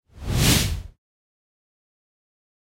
Woosh - Long Cinematic
air, luft